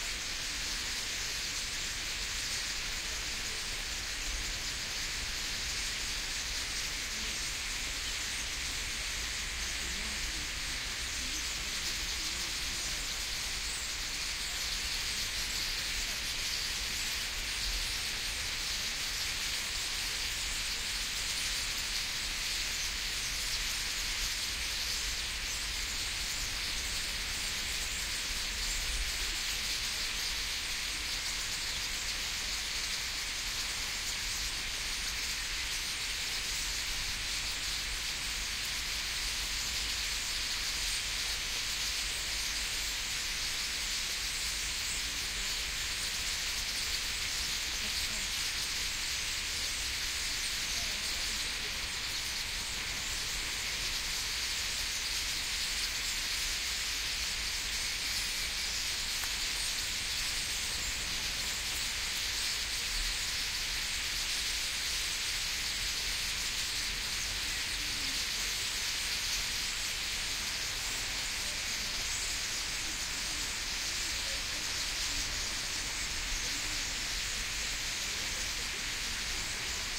Murmuration edit
This is a recording after the murmuration when all of the starlings descended on a single tree.